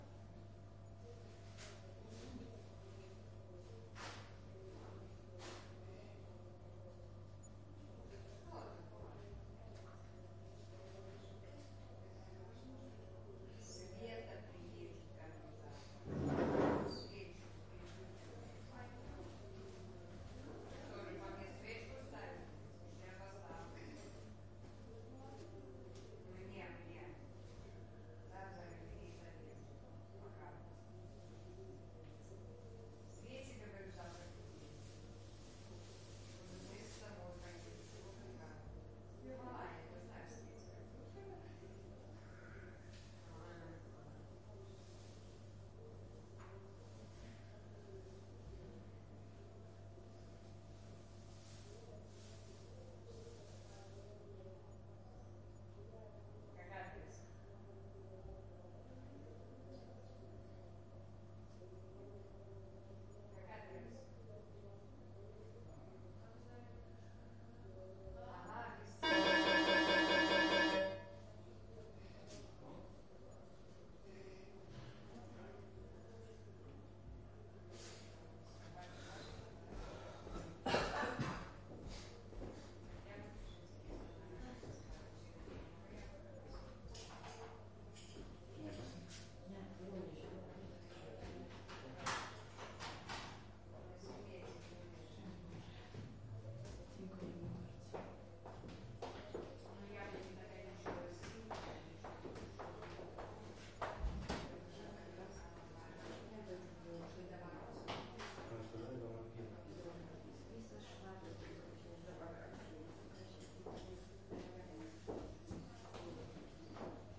This is an ambience of evening in hospital (Vilnius). You can hear people talking in Polish and Lithuanian. People are walking around, cleaning their surroundings and etc.
(beware of loud bell towards the end!)
This is MS recording.
Recorded with: Sound devices 552, Sennheiser MKH418.
ambience, field-recording, footsteps, hospital, people, voices